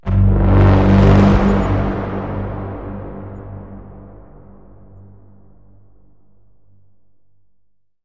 Entirely made with a synth and post-processing fx.
cinematic, dark, dramatic, film, movie, scary, sci-fi, sfx, sound-design, synth